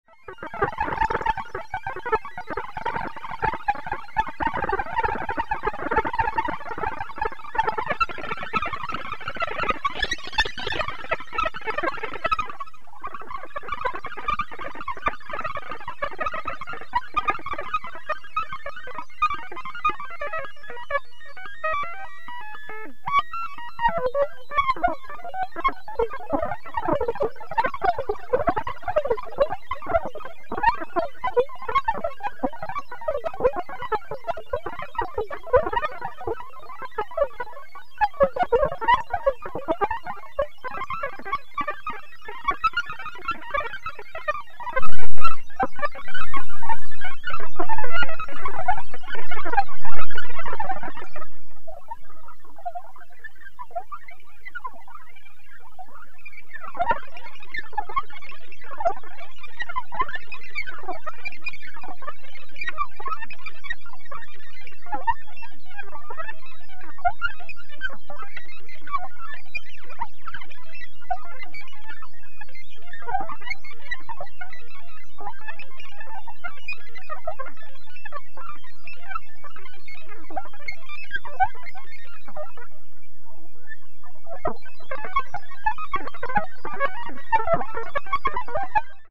Sound Effect
Sample and hold effect
DIY, Modular, Synthesizer, Analog